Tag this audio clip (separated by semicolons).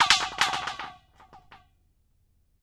pipe; milano; resonance; field-recording; chiaravalle